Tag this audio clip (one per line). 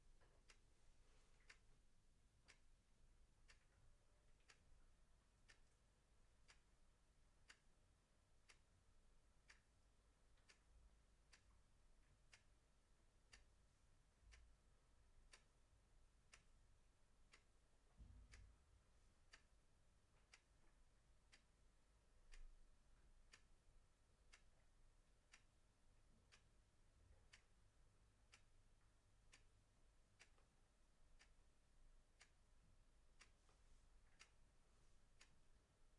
Atmosphere
Clock
Household